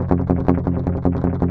cln muted F guitar
Clean unprocessed recording of muted strumming on power chord F. On a les paul set to bridge pickup in drop D tuneing.
Recorded with Edirol DA2496 with Hi-z input.
160bpm, clean, drop-d, f, guitar, les-paul, loop, muted, power-chord, strumming